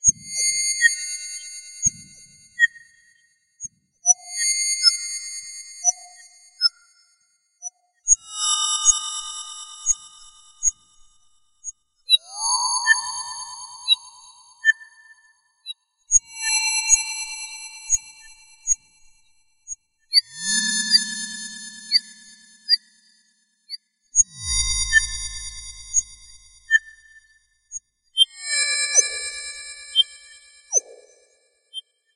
Alien Birds singing in a summer forest of Zeta 2 Reticulum 4 - Home Planet of the Greys with Earth-like atmosphere and nature. Another seamless ambient loop I made back in 2013 with Alien Artifact.
Some inspirational and detailed information on the Zeta Reticuli Home System of the Greys which I found on the net:
alien, alien-artifact, ambience, ambient, atmosphere, birds, forest, loop, sci-fi, seamless, space, synth, vst